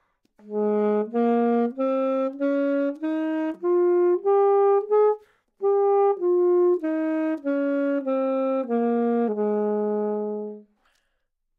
Sax Alto - G# Major
Part of the Good-sounds dataset of monophonic instrumental sounds.
instrument::sax_alto
note::G#
good-sounds-id::6863
mode::major
scale, alto, good-sounds, GsharpMajor, neumann-U87, sax